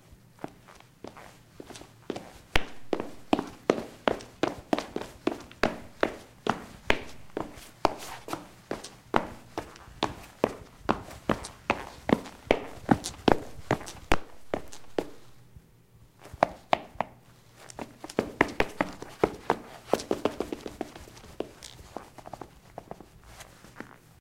Footsteps on tiles.
Recorded with Edirol R-1 & Sennheiser ME66.